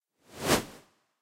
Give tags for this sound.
effect; swish; woosh; video; fast; transition; short; sfx; swoosh; fx; whoosh; sound; game; foley

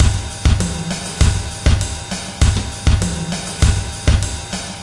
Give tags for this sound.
loop; 100; drumloop; bpm; rock

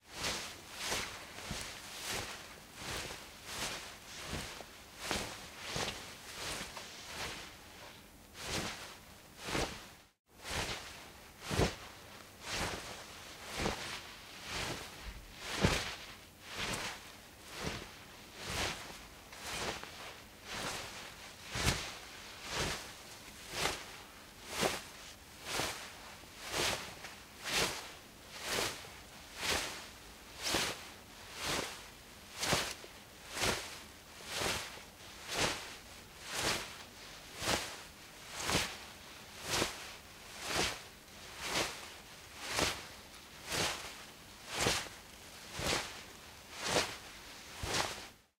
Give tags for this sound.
Cloth; Foley; Movement; Rustle

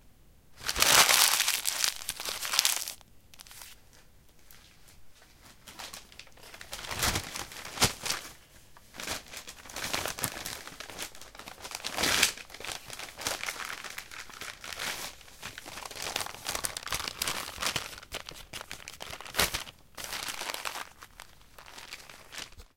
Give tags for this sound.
field-recording; presents; crinkle; paper; dare-9; wrapping-paper; packaging; crumpling; folding

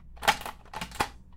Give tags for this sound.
Bloocked; Door; Foley

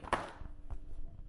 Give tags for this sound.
Notebook; office; table

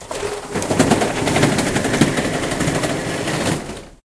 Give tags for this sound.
close; opening; gete; closing; shutter; open

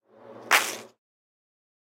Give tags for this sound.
Tierra; Trozos; Caen

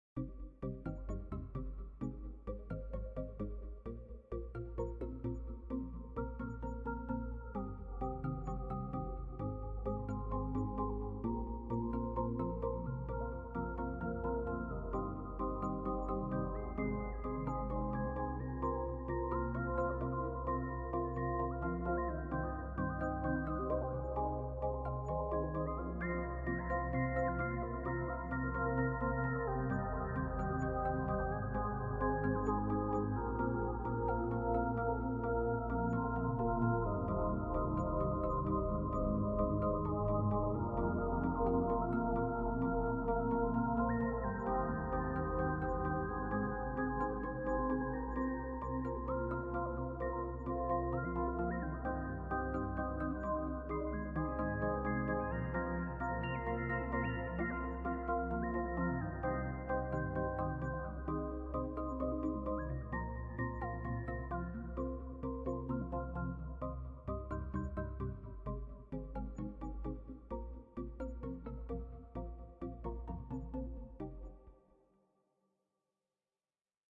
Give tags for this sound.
dance; improvised; piano-loop

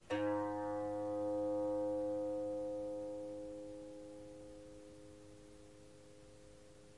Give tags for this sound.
bass; indian

